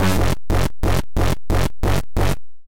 180 Krunchy dub Synths 07
bertilled massive synths